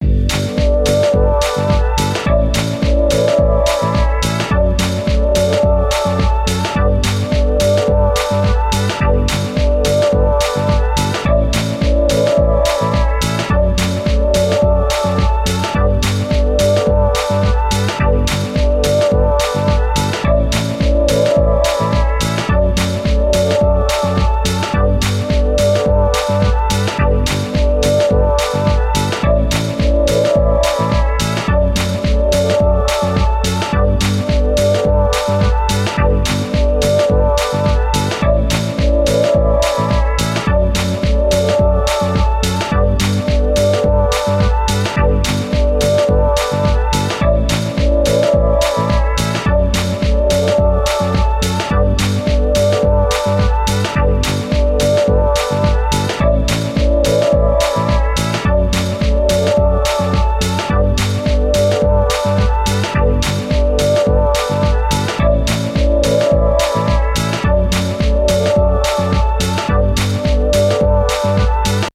House loops 108 simple mix down tempo
free music made only from my samples
90s, bass, beat, bpm, club, daft, daftpunk, dance, drum, electric, electro, electronic, guitar, house, loop, punk, synth, techno, trance